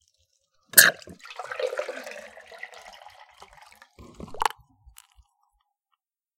Pouring a beer from the tap! It's a Schwarzbier, if you were wondering.